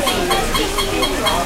H 01 toy dept
a toy in a toy shop making squeaking noises